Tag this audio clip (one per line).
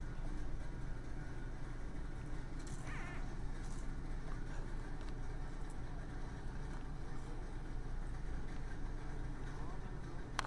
night
ambience
city